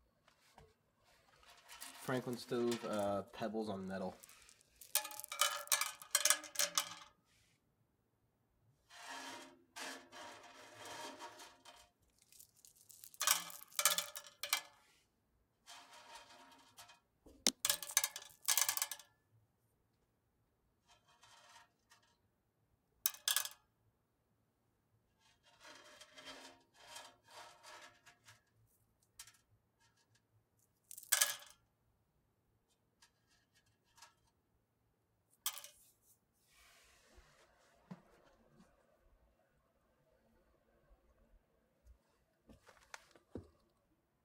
FranklinStovePebblesOnMetal RX
Various sounds from VERY old franklin wood burning stove
door, groans, clang, rusty, metal, stove, metallic, hinge, old, iron